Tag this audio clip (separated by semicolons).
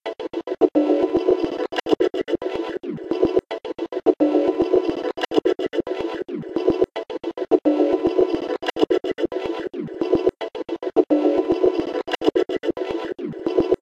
glitch; ambient